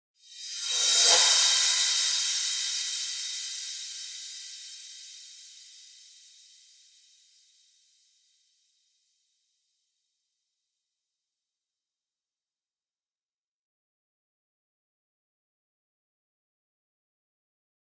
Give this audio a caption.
Rev Cymb 13 reverb
Reverse Cymbal
Digital Zero
cymbal, reverse